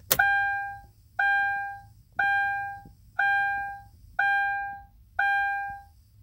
door chime kia
Door chime of my 2008 Kia Rondo
ding, tone, chime, car, beep, kia, bell, door-chime